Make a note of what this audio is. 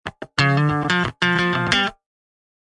Recorded using a Gibson Les Paul with P90 pickups into Ableton with minor processing.